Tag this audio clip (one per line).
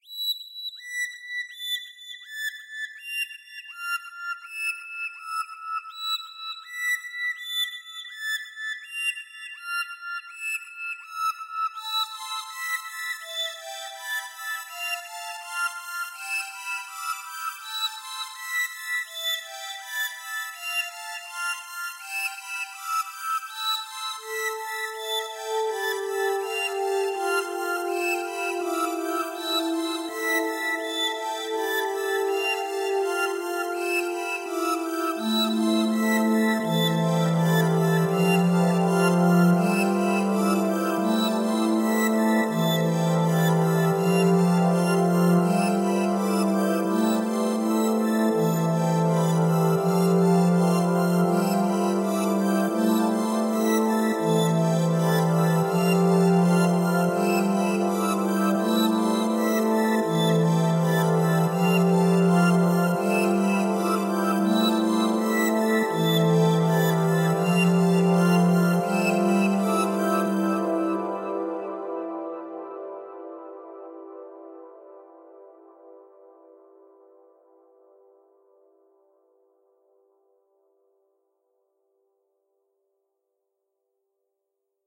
82bpm
ambiance
calm
sad
slow
synthesiser
synthesizer